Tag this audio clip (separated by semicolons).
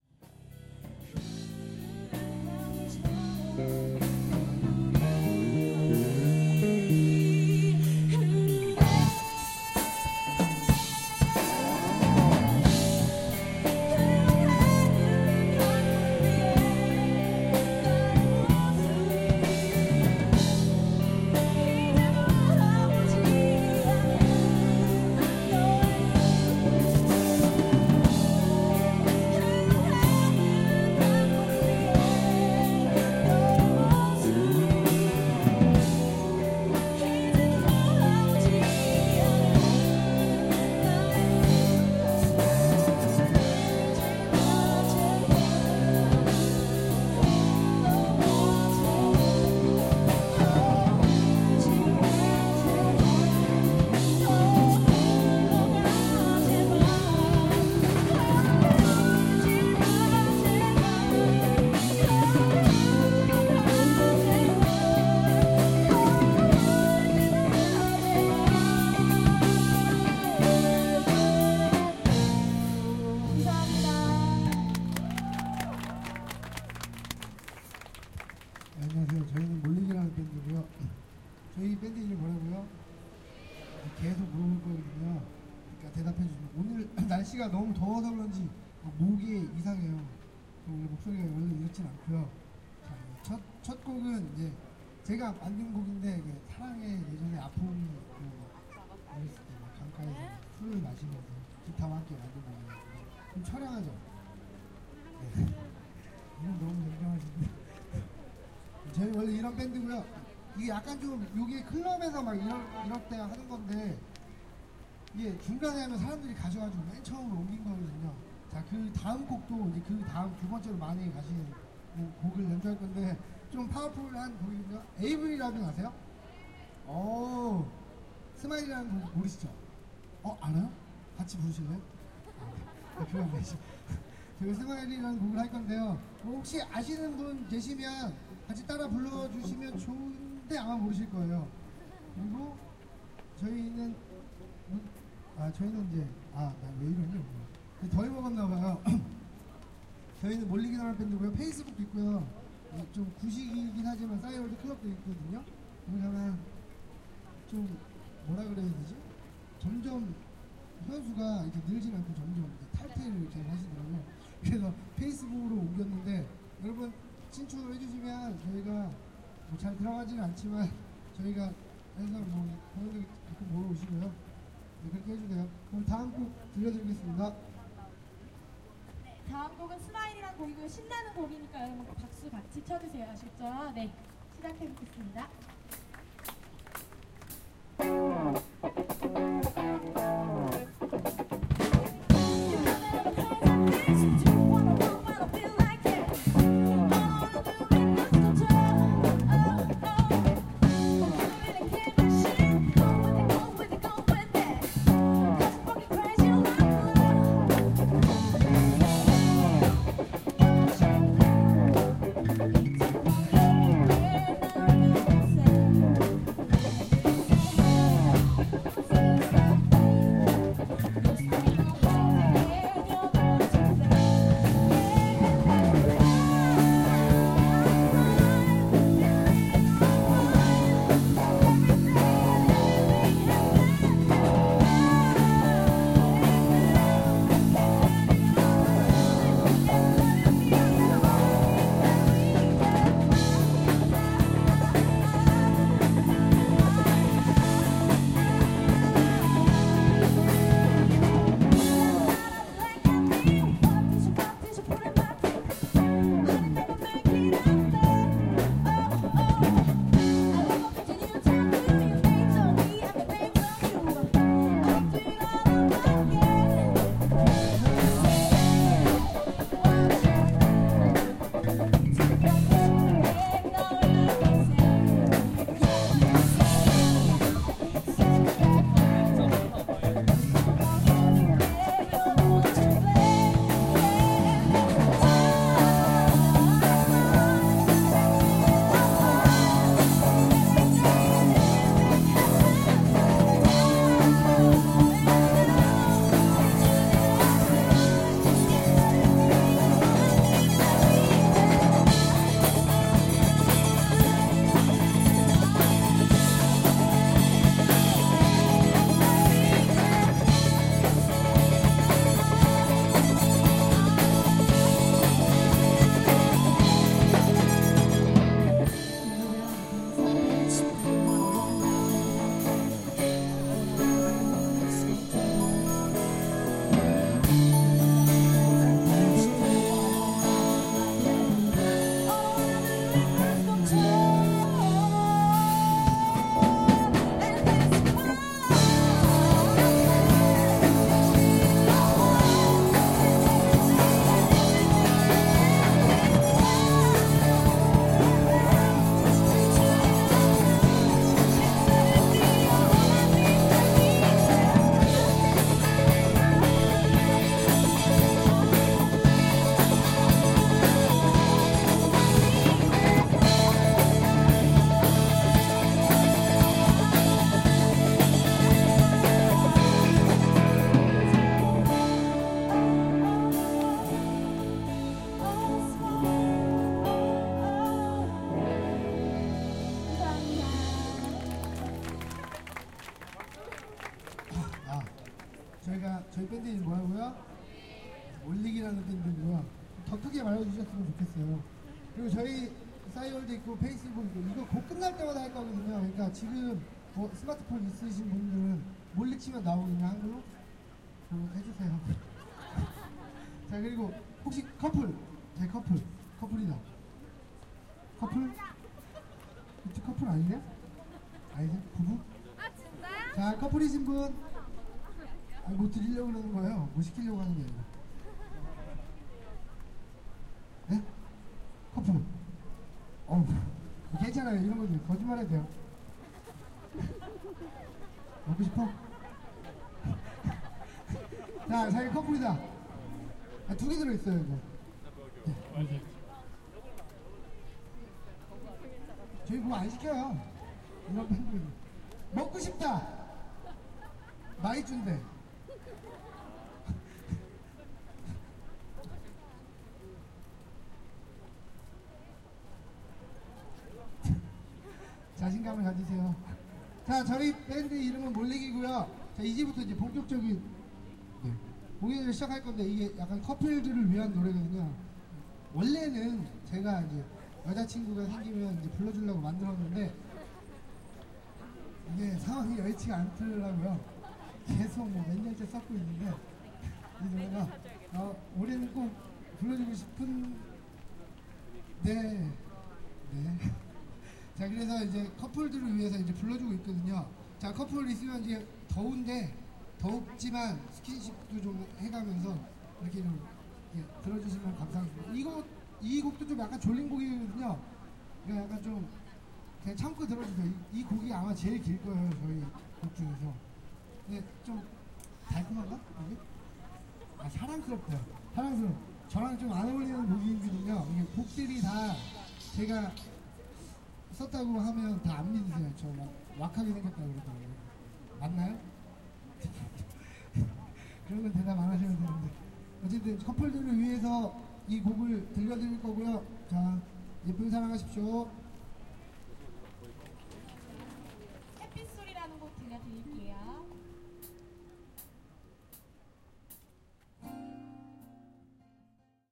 clap; field-recording; korea; korean; music; seoul; street; voice